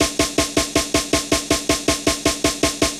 Amen Snare - Eighth Notes
Amen snare fill
snare-rush, snare, fill, roll, amen